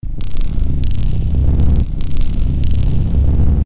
sound-design created from processing detritus so that it sounds like a sustained rhythmic pad; made with Adobe Audition
electronic, sound-design, rhythmic, dark, loop, ambient, industrial, pad